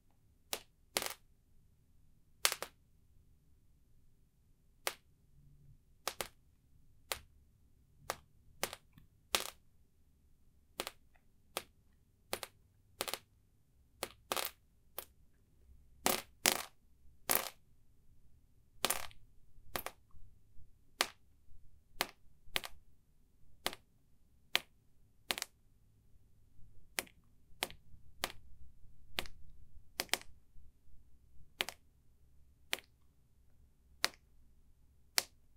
Water drops on linoleum floors
Short recording of water dripping onto linoleum. This was recorded inside a full-sized bathroom with linoleum floors. The water was dropped using a turkey baster held ~5 feet in the air. The recorder was placed on the ground ~2 feet away from where the drops hit the floor.
The only processing this recording received was a bit of noise removal in Audacity.
Equipment: TASCAM DR-05
Location: Everett, WA, USA
Area: Full bathroom, linoleum floors
Date: February 9th, 2017
Time: 10:24 AM